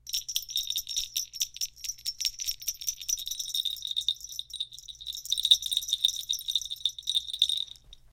Jingle-Bell-Tex
Waving a couple of jingle-bells (more patterns), recorded with Neumann TLM103
christmas, jingle-bell, claus, jingle, santa, rattle, bell, texture, waving, shaking